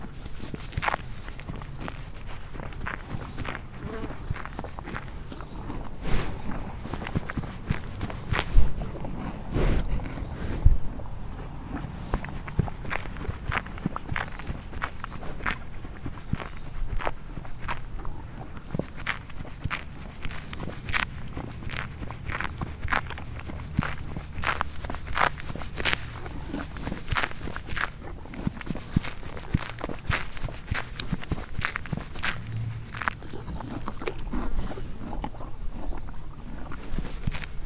Horse Graze 1
Recording of a horse grazing a grass in a field
animal, chew, chewing, eat, eating, grass, graze, horse